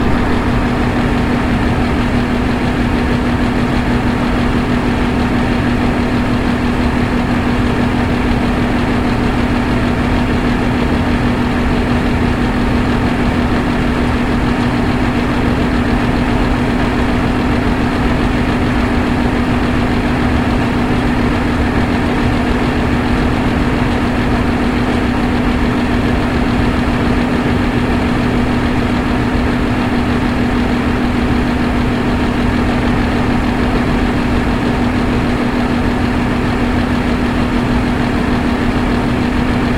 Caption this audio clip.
heater blowing 01
I recorded a heater. Could work for any sort of fan though.